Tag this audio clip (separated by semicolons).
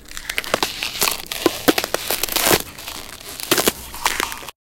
sound; step; BREAK; footstep; freeze; snow; ice; frost; crack; field-recording; cold; foot; winter; frozen; walk; effect